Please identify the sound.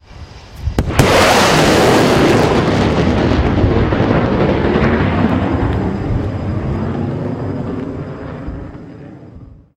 Sonic boom by a jet fighter.
army, fast, supersonic, f16, plane, explosion, speed, air-force, boom, flying, jet, pilot, f-16, flight, quick, fighter, airforce, military, bang, navy